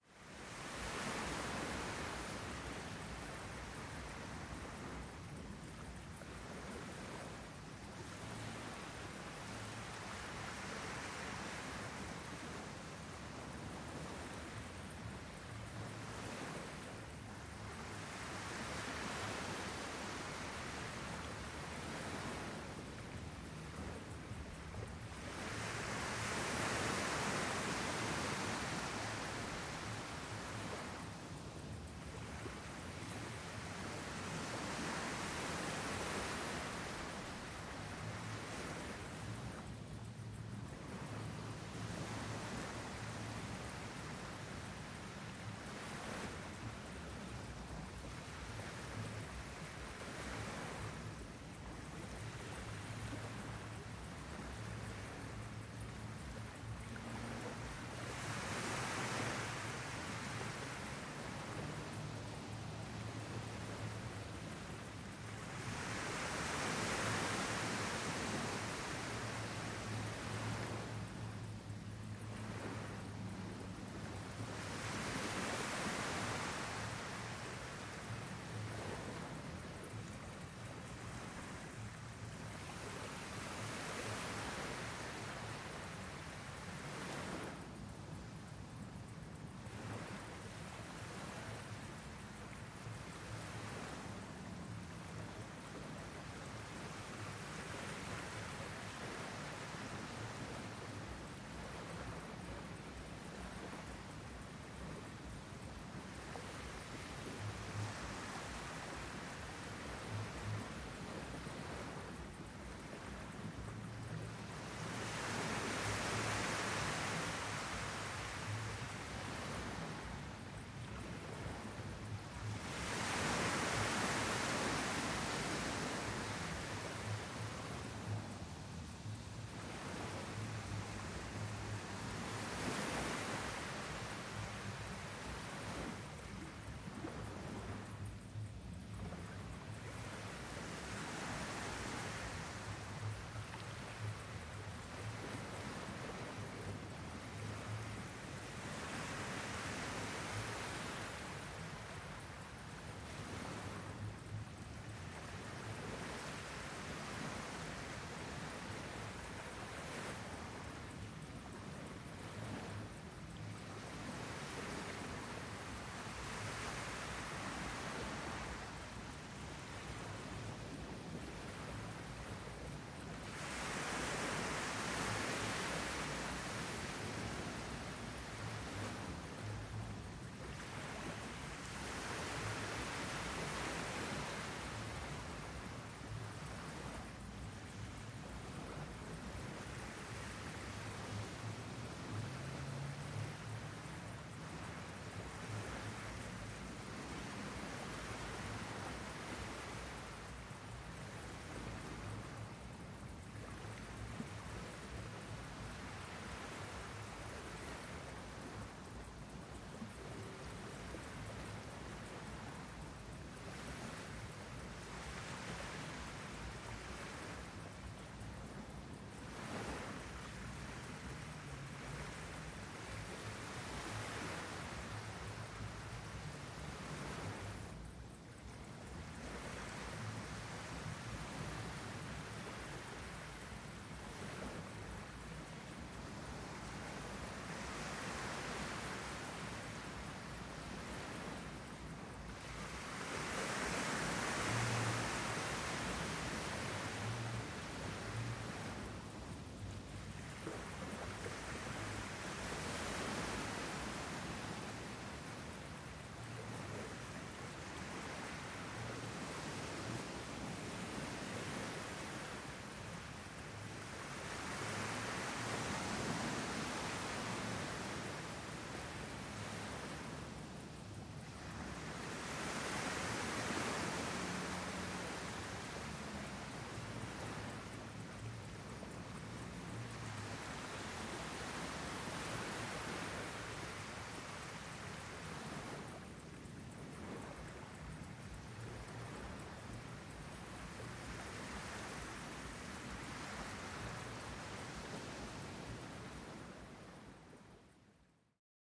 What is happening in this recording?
Distant seashore - Hyères
Rather distant recording of the seashore in Hyères, Provence-Alpes-Côte d'Azur, France.
Recorded with my mobile phone with a Shure mv88 on August 2015.
field-recording, seashore, shore, shure-mv88